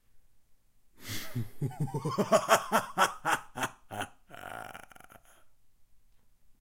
Evil Laugh 2
Evil Villian laughter
laugh, evil, baddie, man, villain, voice, male, laughter